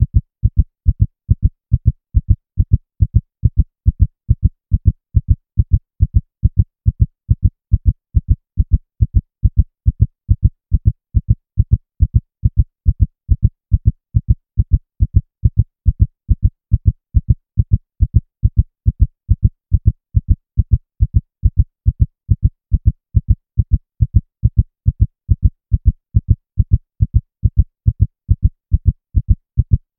heartbeat-140bpm-limited
A synthesised heartbeat created using MATLAB. Limited using Ableton Live's in-built limiter with 7 dB of gain.
body, heart, heart-beat, heartbeat, human, synthesised